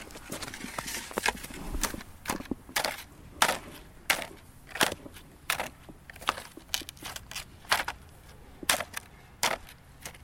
SKI WALKING
Sound of Children Skis while walking to the lift
Bindings, Ski, Skis, Sound, Winter